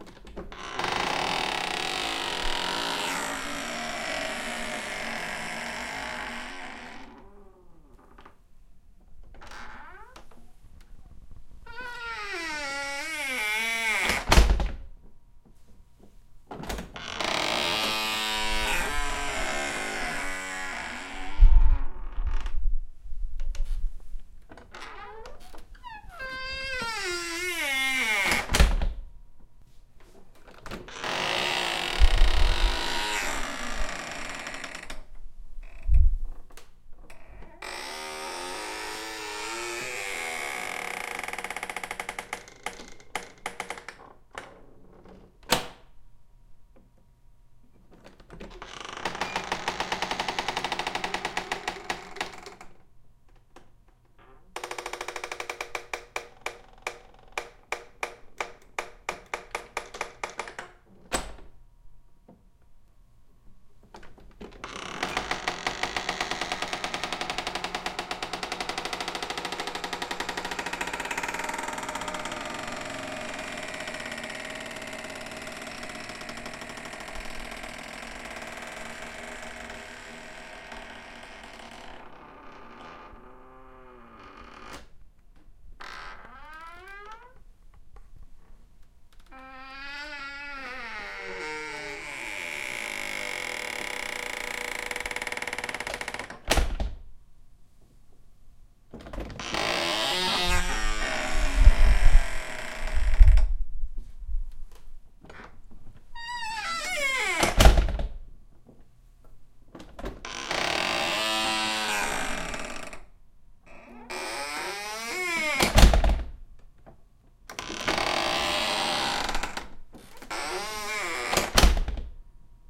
je creakydoor
Creaky door open and close.